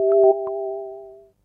Prog RiseOco C
Programmed into Casio CT 1000p Vintage Synth
1000p, Casio, CT, Synth, Vintage